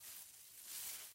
Sonido de las hojas de los árboles moviéndose
hojas, leaves, movement, movimiento